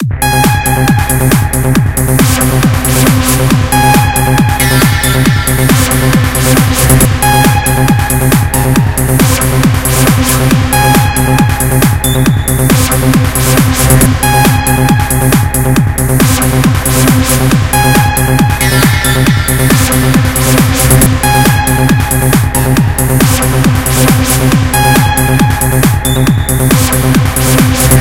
pure ultra night club music loop demo by kk
pure-club-music, pure, wave, dance, club